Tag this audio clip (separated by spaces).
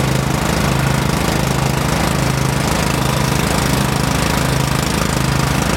motor tractor lawnmower engine